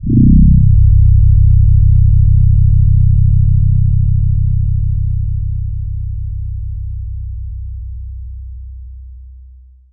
LF bass 4
Playing around with FM synthesis. Low frequency tones. Rendered on SoundForge 7
bass, low-frequency